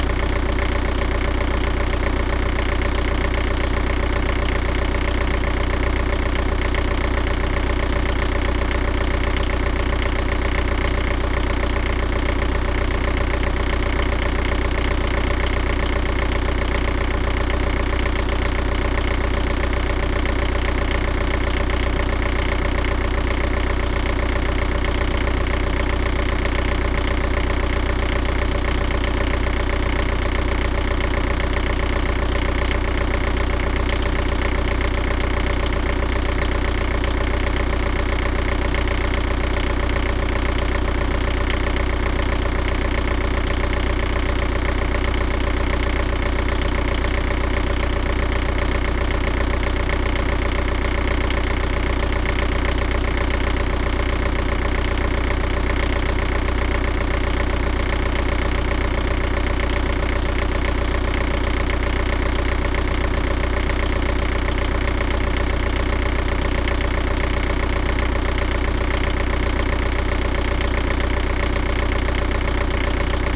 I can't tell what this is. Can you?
The Volvo Penta MD 22 (59 hp, 4 cylinders) Recorded in the engine compartment of my sailboat. Loops very well. Recorded with a Zoom H2.